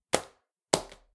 DVD single on floor v2
DVD Shell dropped to floor / on the ground
Shell, dropped, DVD, ground, floor